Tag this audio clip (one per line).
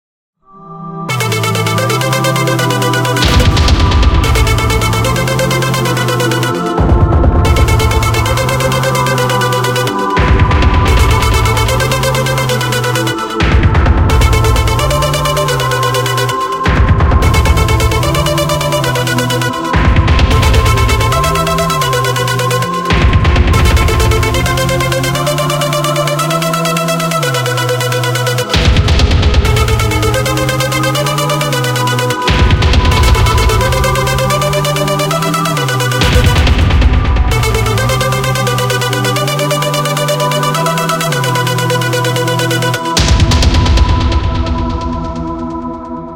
percs
cinematic
130-bpm
percussion-loop
hoover
cleaner
percussive
sandyrb
vacuum
quantized
drums